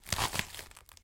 Some gruesome squelches, heavy impacts and random bits of foley that have been lying around.
blood, death, foley, gore, mayhem, splat, squelch